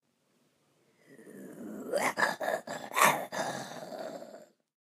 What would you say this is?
Creature sound
beasts, creature